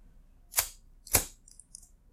Simple, single sound of igniting the flint lighter.
cigarette, flint, ignition, lighter, smoking, spark